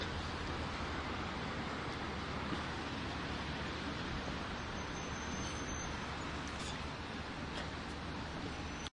virginiabeach wawa13south
The last Wawa I will see in a long time recorded with DS-40 and edited in Wavosaur.
field-recording, virginia-beach, wawa